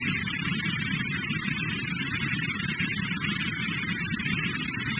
Tiny pixels of light turned into microbursts of noise with software.
synth, space